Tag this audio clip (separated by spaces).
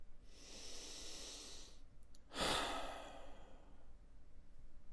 breathing despondent Male sadness sigh wowthesaurus